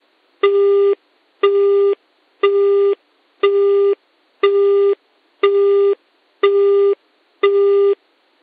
Phone interruption
Japan, Japanese, phone, stop, telephone